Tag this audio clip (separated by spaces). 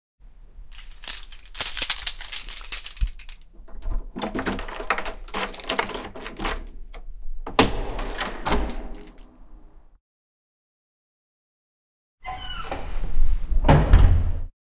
close closing door entrance keys open opening squeak